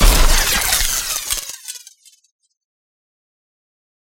Laser gun Cannon shot
Quick laser gun sound effect that I made through Pro tools 10.